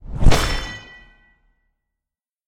You slash a monster with a cleaver!
From my short, free, artistic monster game.
blade, cleave, knife, slash, sword, sword-slash, weapon